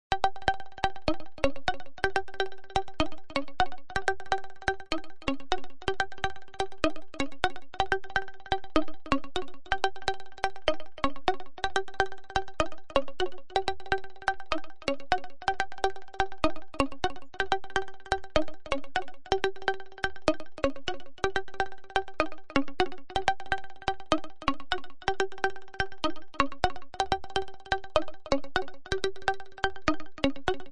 synth MAST
experimental techno sounds,production